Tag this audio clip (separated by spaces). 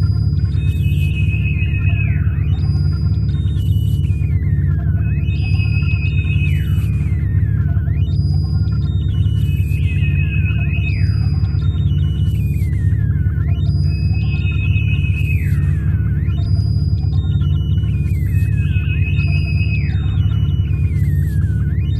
Ambient Aliens Electronic Sci-fi Spaceship Radio Signals UFO Noise Pulse Space